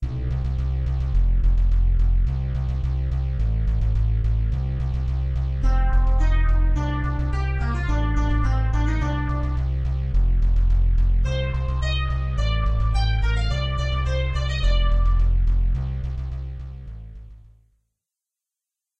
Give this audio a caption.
bass&lead tune
A short tune I made on Musescore and Audacity. The first part can be cut, and repeated as a background.